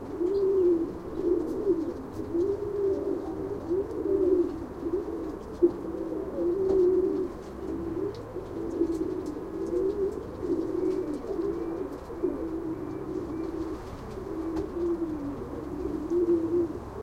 Cooing Doves
What is this I hear? Some kind of dove coop?
bird, cawing, coo, dove